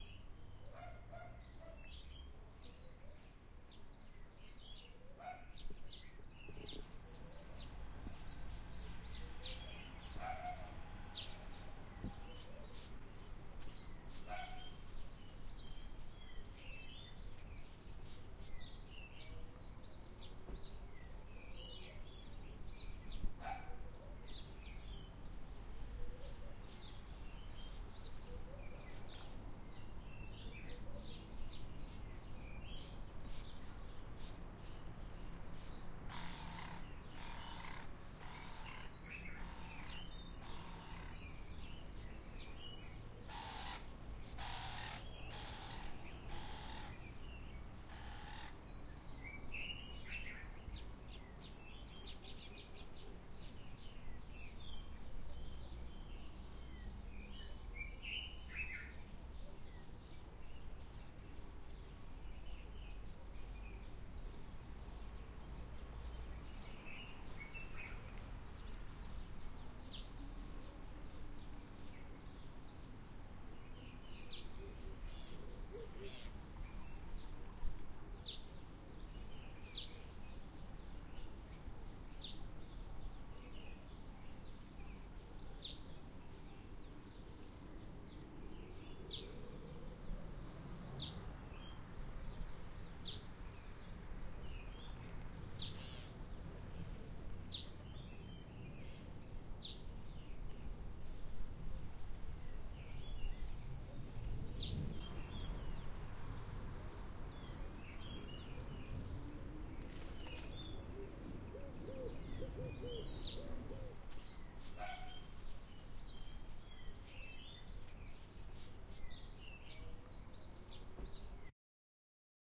Birds Chirping and small amount of dog barking in background
Birds and dogs ambiance sounds and a lights wind breeze dog barks mostly at the start of the sound clip and different birds chirping.
And, Bird, Dog, OWI